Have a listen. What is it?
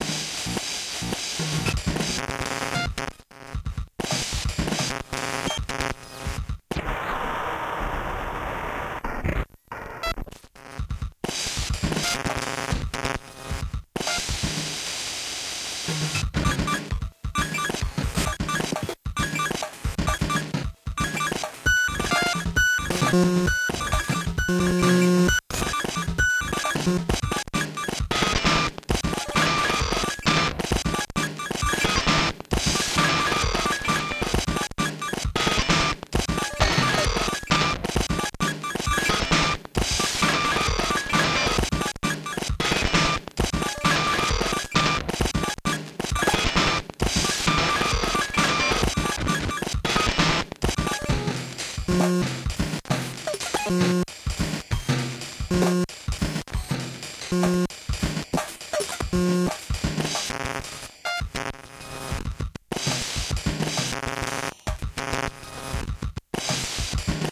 Circuit bent drum sounds 2
Circuit bent Casio MT-260 with added patchbay producing unusual drum patterns and glitches.